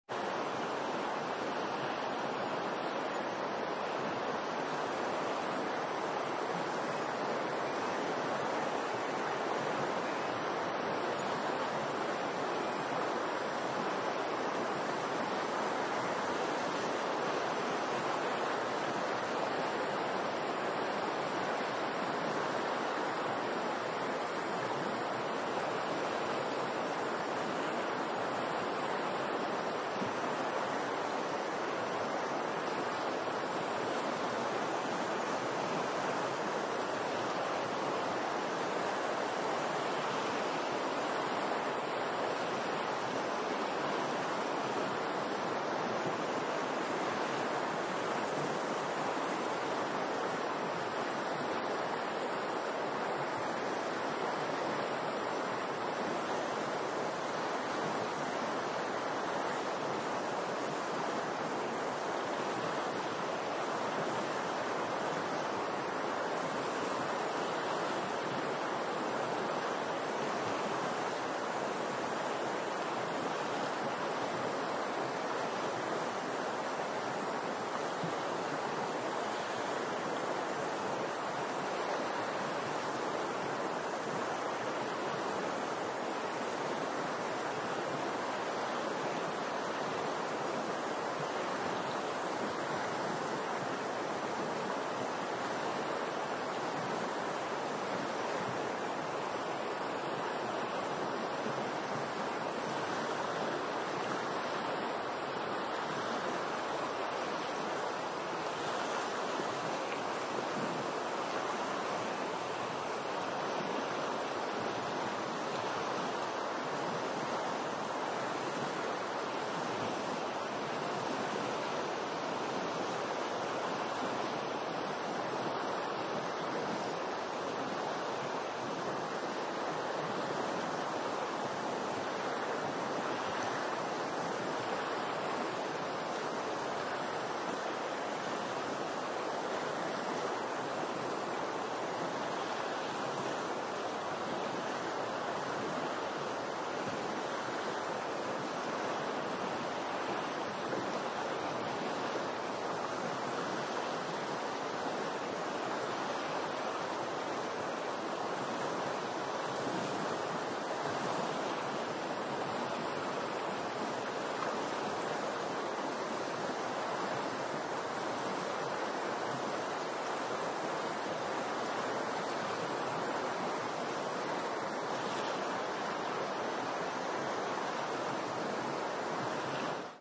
James Bay ambience - lake - distance of 50m from the shore
Inside a pine boreal forest - Quebec, Canada.
lake, exterior, canada, outside, background, pine, forest, outdoor